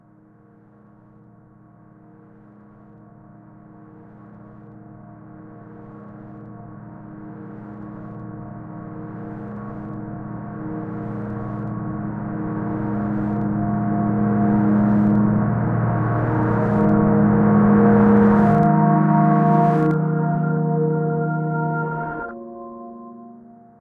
You can't do justice to a sound without listening forward and backward. Rhodes, distortion: too intimate to be married.